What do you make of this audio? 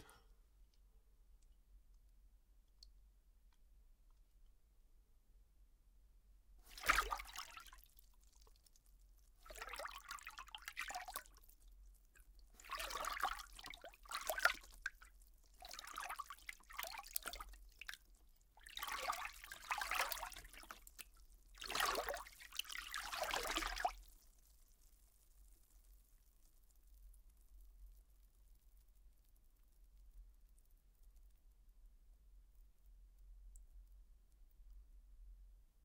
fly 8 rowing sound 10
foley of boat rowing just water
rowing, splas, splashing, swimming, water